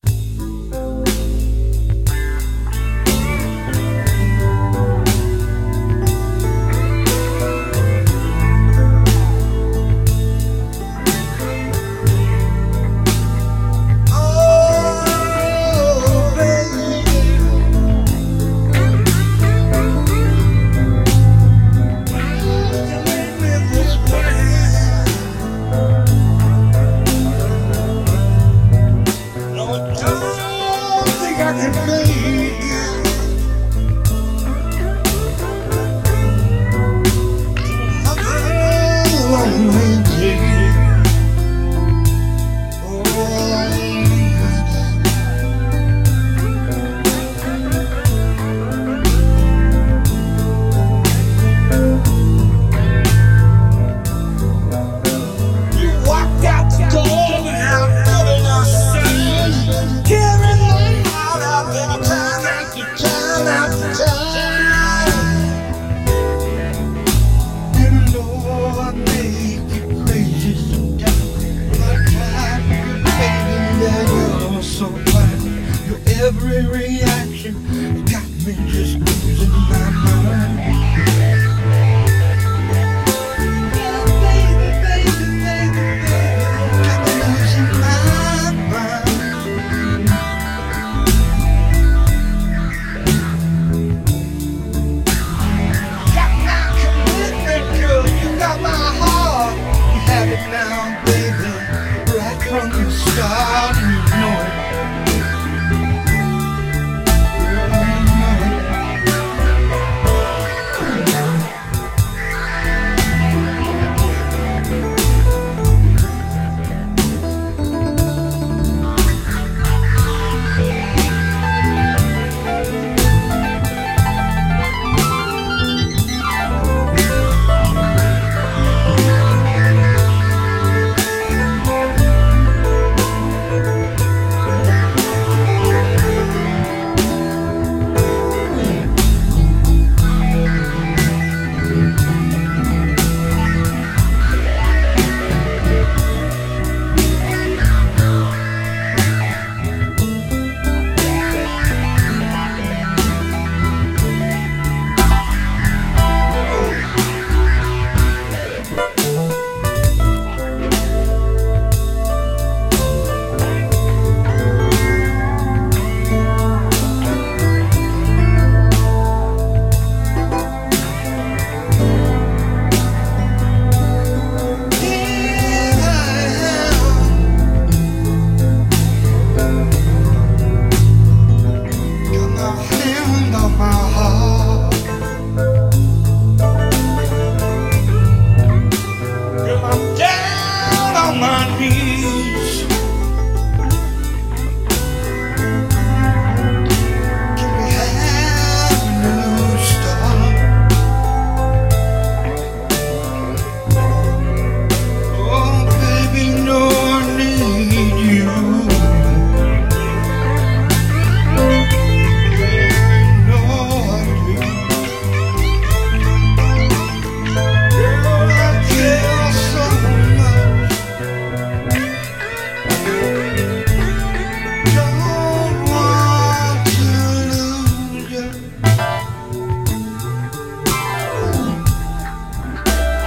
Don't Want to Lose You
A short clip from one of my Original Compositions.
Equipment used: Audacity, Yamaha Synthesizer, Zoom R8 Portable Studio, Hydrogen and my gronked up brain.
Audio, Blues, Classical, Dub, Dubstep, Electro, Guitar, House, Jam, Keyboards, Music, Original, Rap, Rock, Synth, Techno, Traxis